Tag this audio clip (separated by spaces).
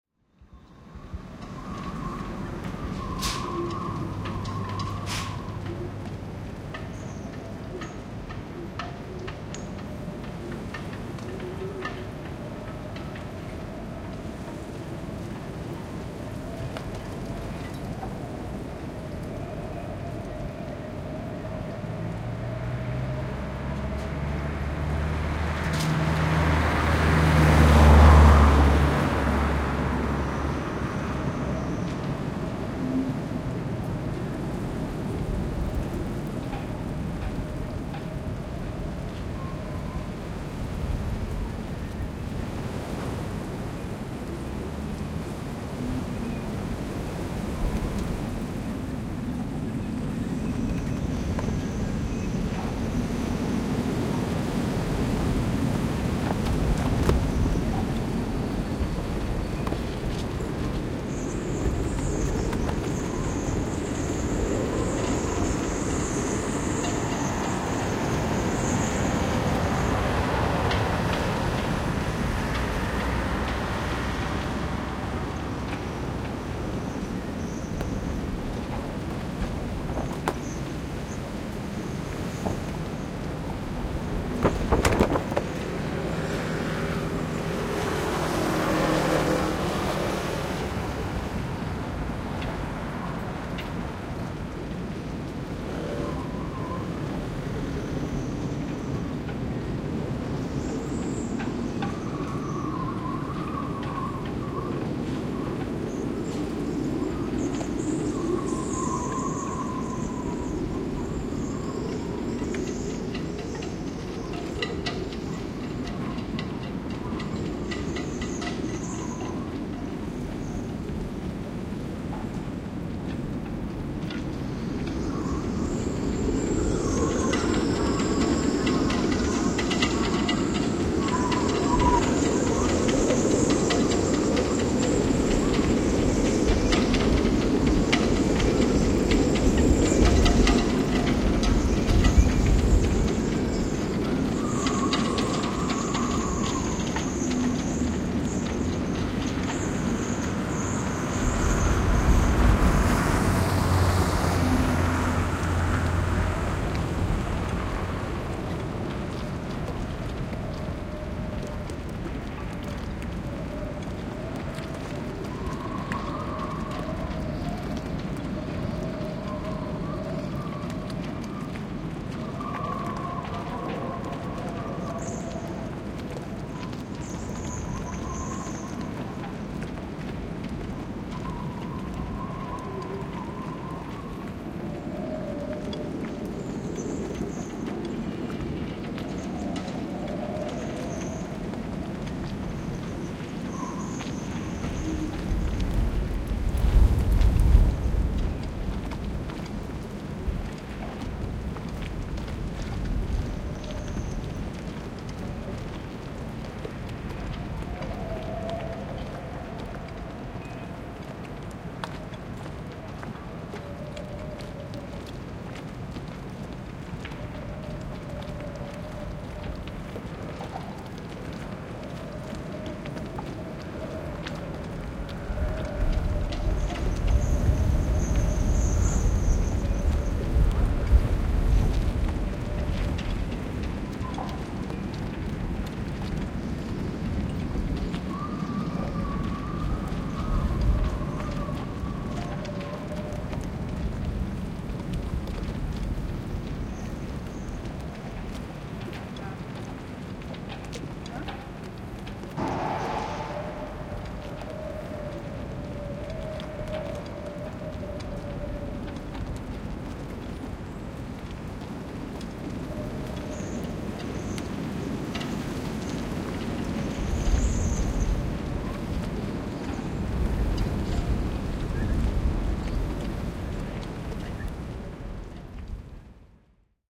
Harbor air field-recording la-rochelle paysage-sonore port the-pontoons-creak vent vent-dans-les-filins voiliers wind